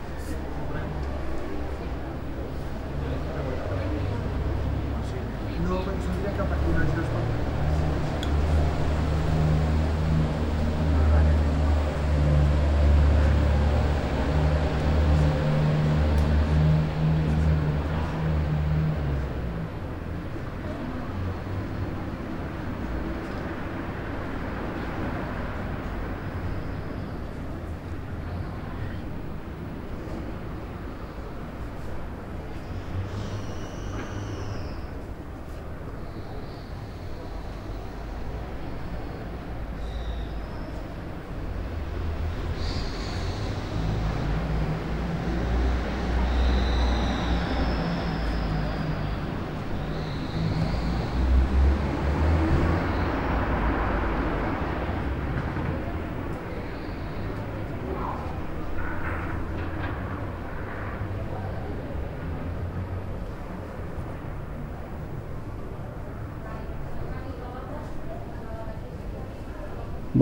street ambient low traffic 1
ambience, street